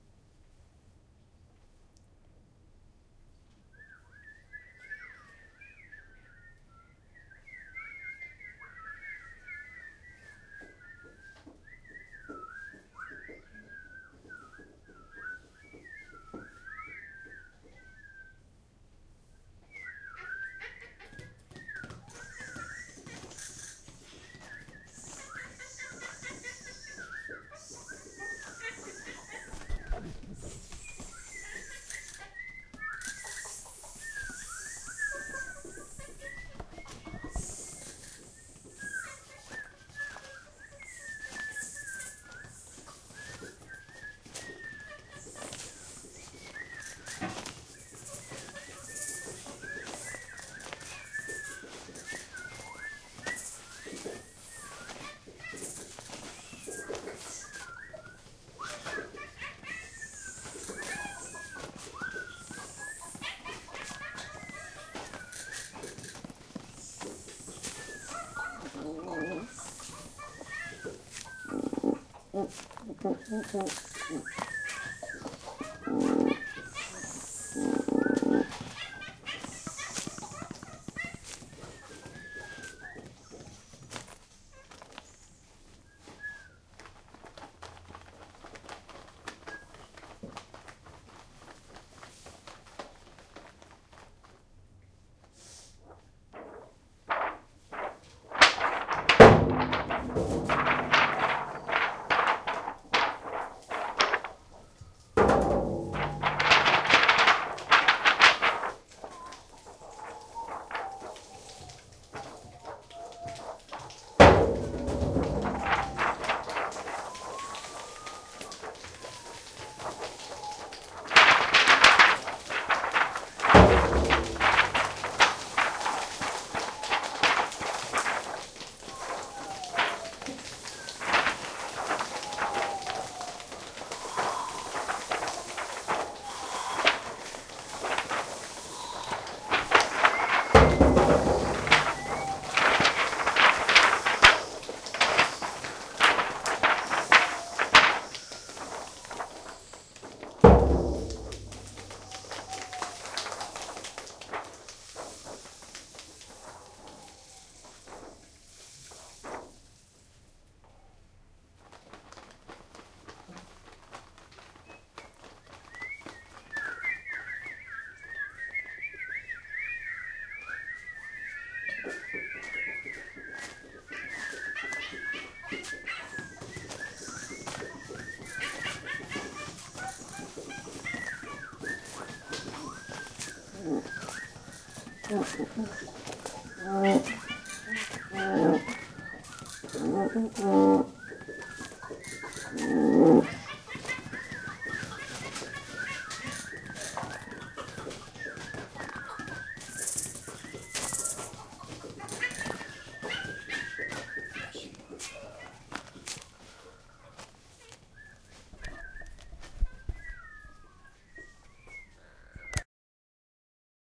Ambiance sonore réalisé le 20 mars 2013 par la classe de Jérôme Euphrosine au Lycée Français de Barcelone avec l'intervenant de musique José Carrasco.
animals, storm, Jungle, rain